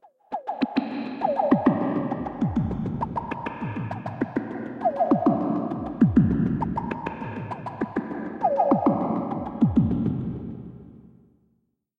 THE REAL VIRUS 04 - RESONANT FREQUENCY LOOP 100 BPM 4 4 - G#2
High resonant frequencies in an arpeggiated way at 100 BPM, 4 measures long at 4/4. Very rhythmic and groovy! All done on my Virus TI. Sequencing done within Cubase 5, audio editing within Wavelab 6.
100bpm, groove, loop, multisample, rhytmic, sequence